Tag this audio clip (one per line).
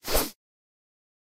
gun
katana